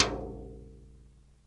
Beat on trash bucket (light & long & hollow) with rimshot